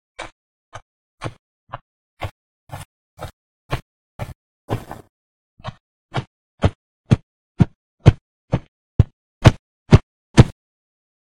Soil Steps
Walking on grass. In my opinion could be also used for dirt, that why it's called "soil". You should play with volume on this one. Background noise removed as usual. Enjoy!
footsteps, feet, walking, grass, dirt, ground, soil, earth, steps, foot, walk, step, footstep